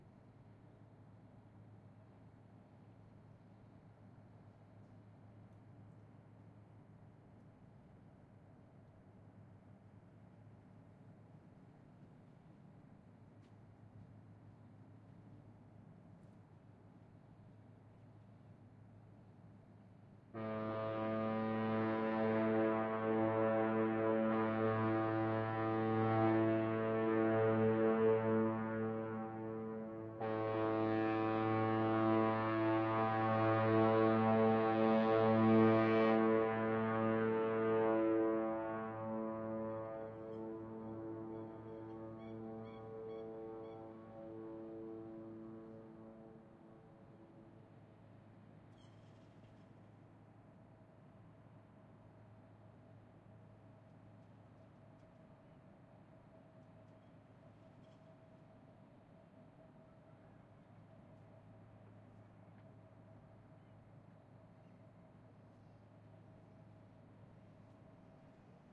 Sounds of a ships fog-horn in the medium distance. This is part of a 3 recording set. The ship made the same sound three times as it came up a harbour. This recording was made at night. Temperature 5 degrees C. The horn reverberates off the surrounding hills.
big, foghorn, honk, tasmania